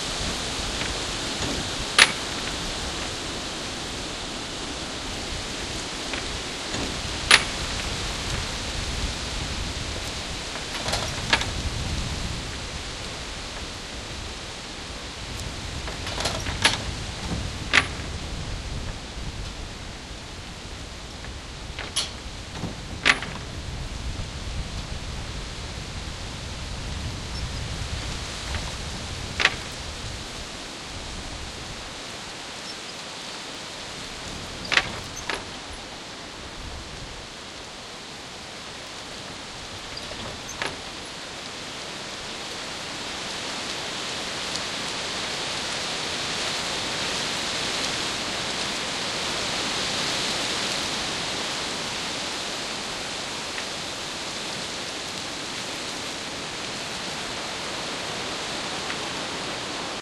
Padlocked Gate on Stormy Night
This is the sound of large wooden gates padlocked together with a chain, shifting about in the strong wind.
Dragged, Padlock, Weather, Wooden-gate